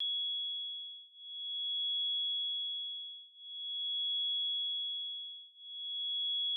Ringing loop
High-pitched ringing sound